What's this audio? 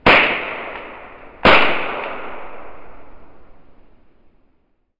This is a stereo recording of a small toy cap gun slowed down to 25%. I think it sounds a little like a .22.